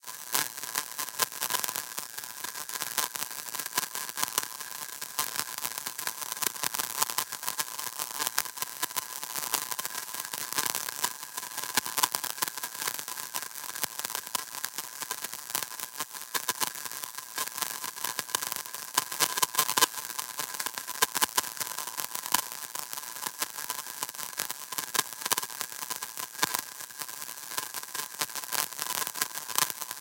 KB Broken Record Crisp
Ears may hurt a bit
All kind of sounds.
record, digital, stereo, fx, crisp, crack, noise, sound-design, experimental, sfx, glitch, broken